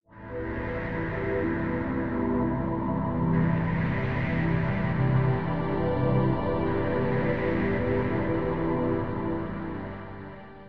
Layered pads for your sampler.Ambient, lounge, downbeat, electronica, chillout.Tempo aprox :90 bpm
electronica, lounge, sampler